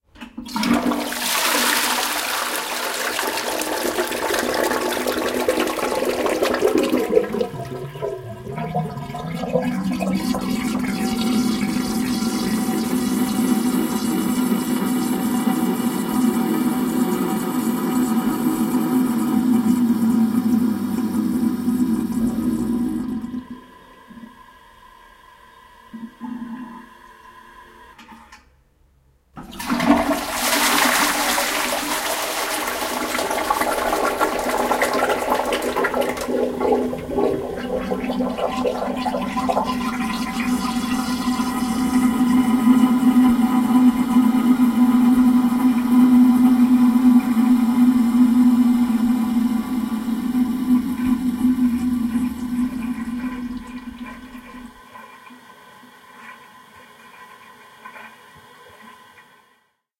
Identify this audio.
dead toilet flush
Our dormitory toilet sometimes flush very strangely. It sounds like bubbles and rumble. I recorded it two times. No idea, what cause this but recordings are mostly from morning times, between 7 and 9 AM. Recorded with Zoom H1, lovcut filtering at the very end as I probably hit the recorder loudly.